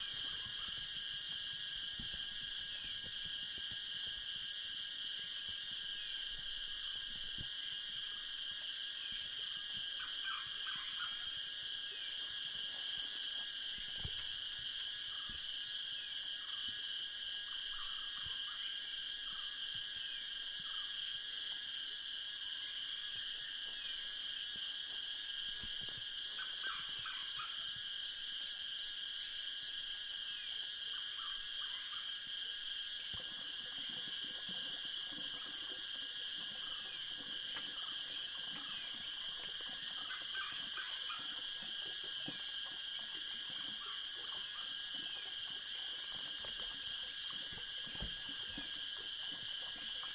Crickets in forest. Mono track. Recorded on field in Nilgiri forests in India.
frogs, field-recording, forest, ambient, india, ambiance, western-ghats, morning, ambience, crickets, nature, nilgiris